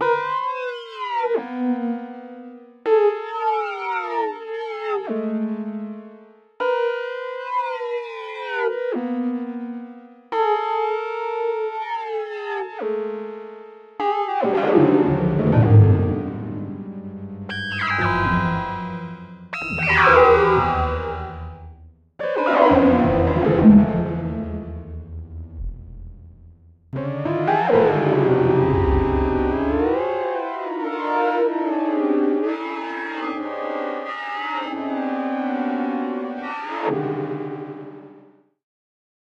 39 second mixup
One of a group of three short pieces using arbitrarily generated pitches of various synthesized resonant tones manipulated in real time (random synth glitch?). Then I take out the parts I don't like.